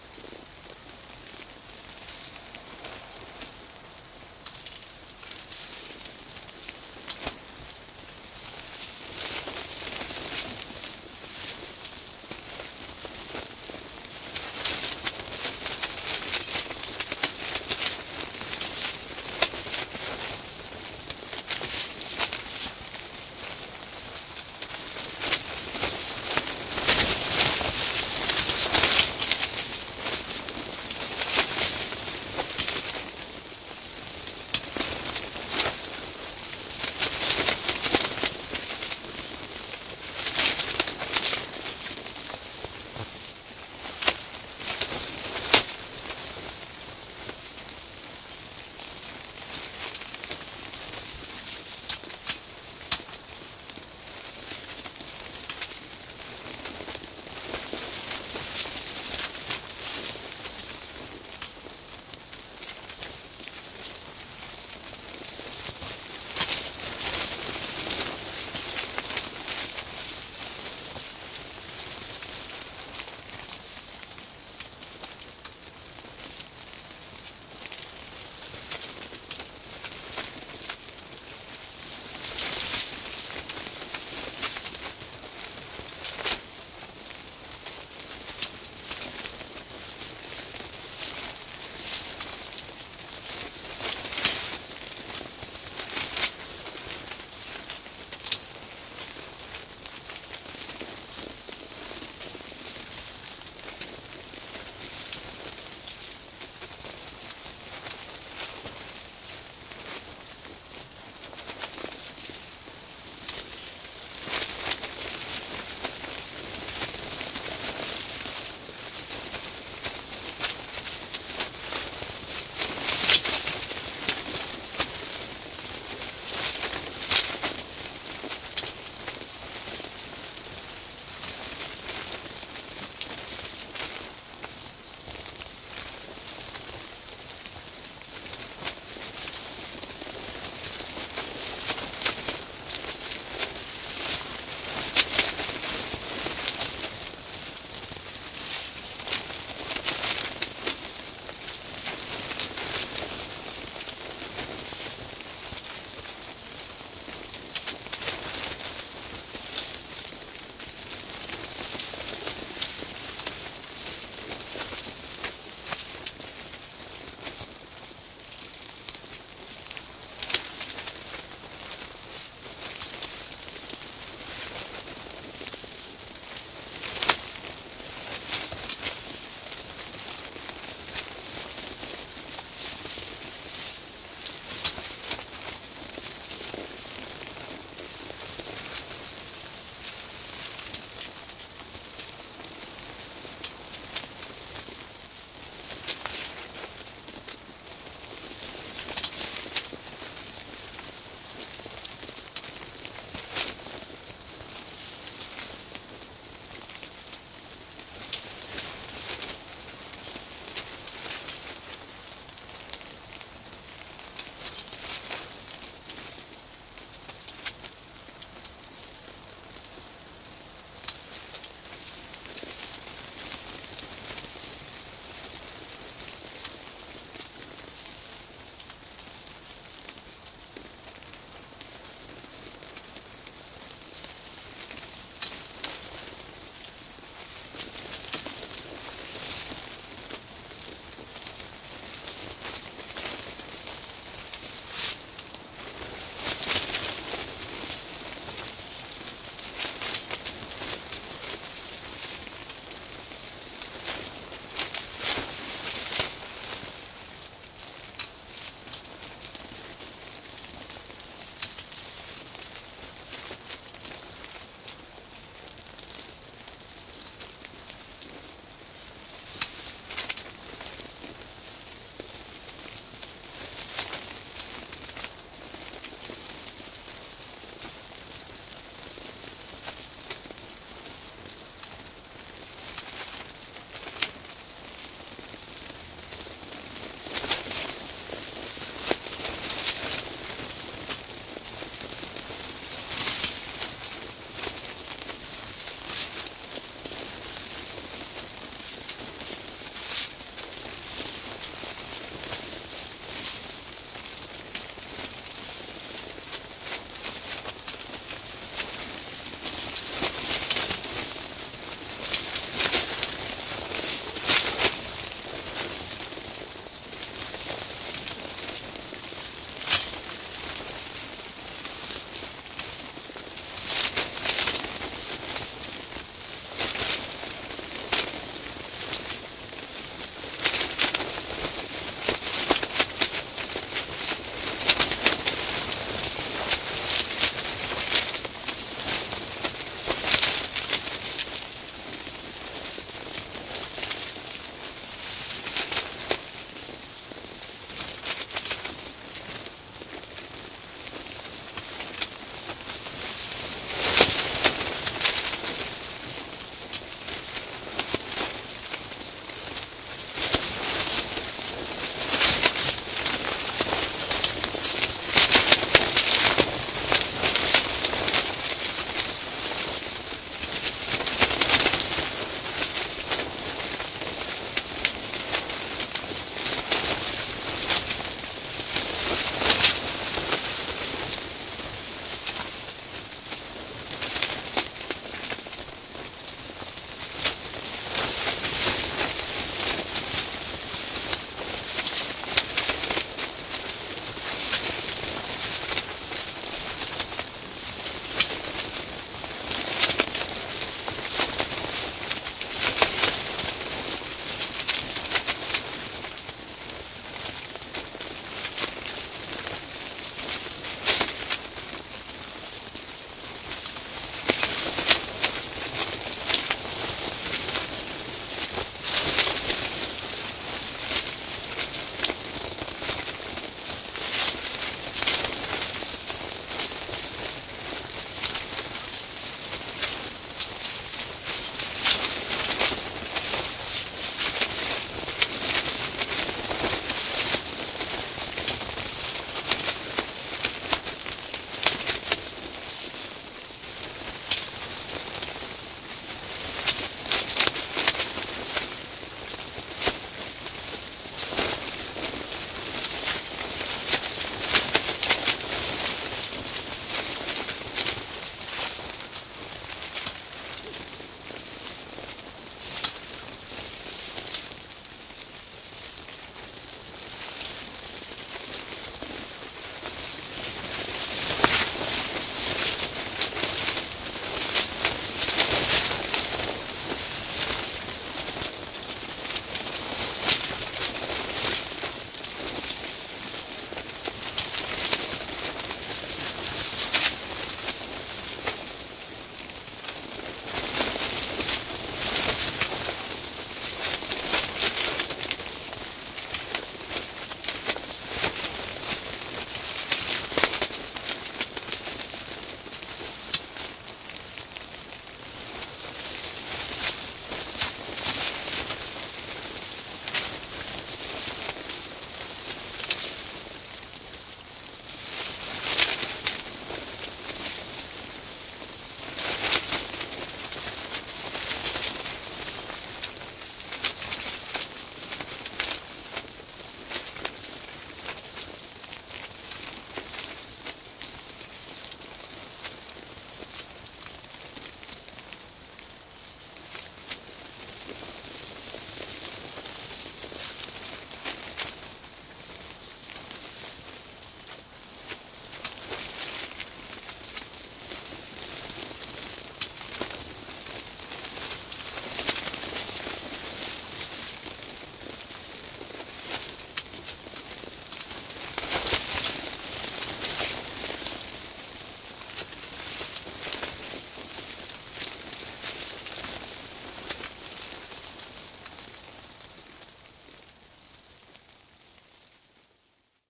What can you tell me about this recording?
A recording taken inside a large tent on a windy night on the Dorset coast. A recurring sound throughout the recording is the eyelet of the outer layer of the tent working loose from the anchor peg at the porch area.
Windy, Tent